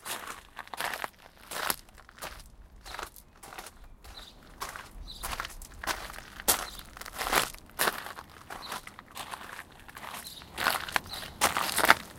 Walking on gravel
Recorded with ZOOM H1. Walking on the gravel on my parking lot. Birds can be heard in the background.
stones, walking, birds, gravel, ambient, shoes, field-recording